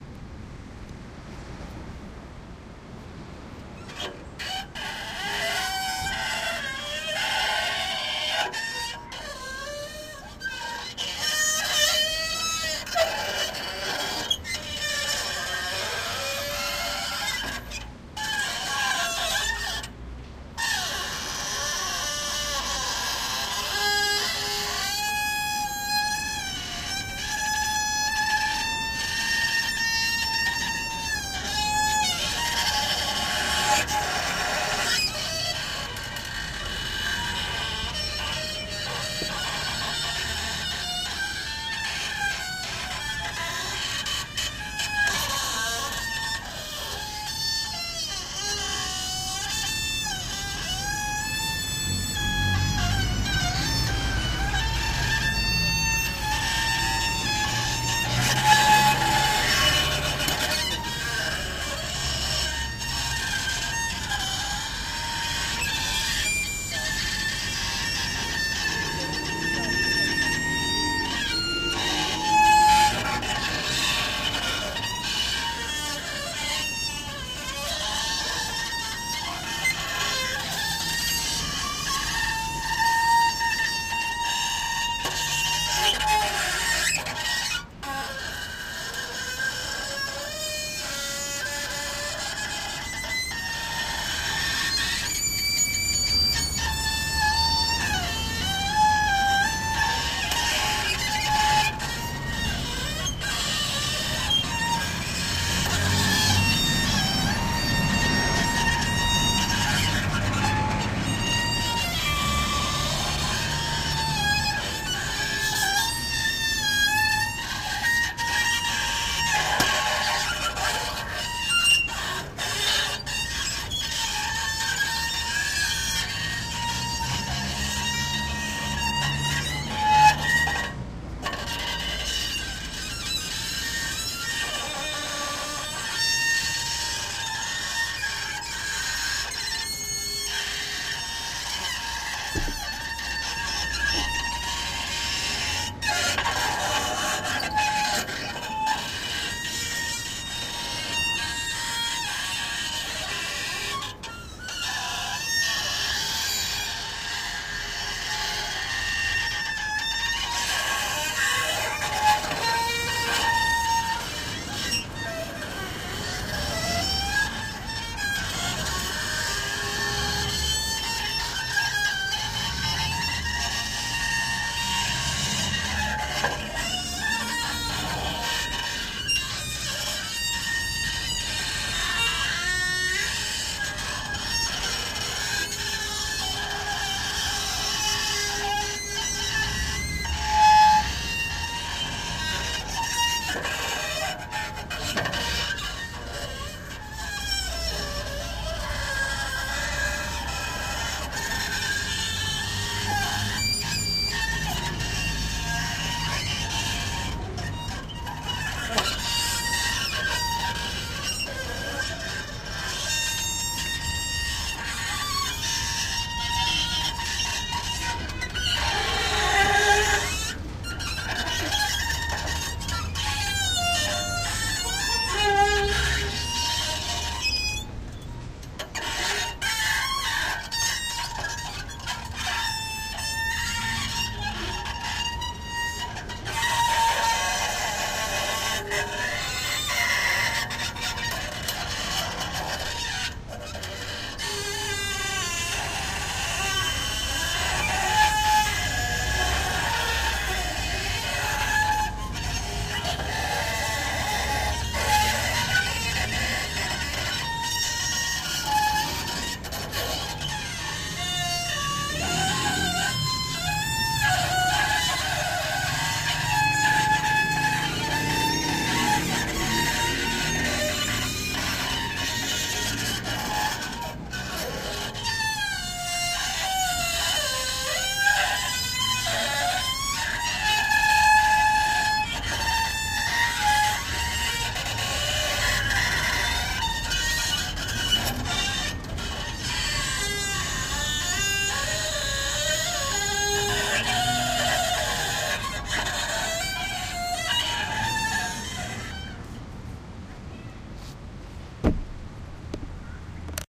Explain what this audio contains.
a noisy playground carrousel "played" as an musical instrument in a suburbian square in São Paulo Brazil.